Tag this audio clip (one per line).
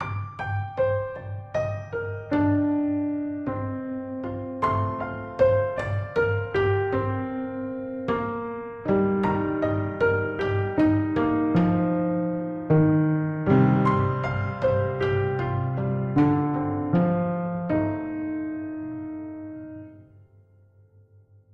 acoustic
loop
piano
sample